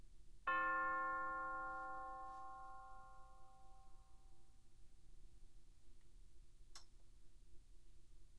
Instrument: Orchestral Chimes/Tubular Bells, Chromatic- C3-F4
Note: C, Octave 1
Volume: Pianissimo (pp)
RR Var: 1
Mic Setup: 6 SM-57's: 4 in Decca Tree (side-stereo pair-side), 2 close